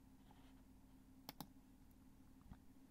Mouse clicks. Recorded with a Neumann KMi 84 and a Fostex FR2.
computer, button, office, mouse, click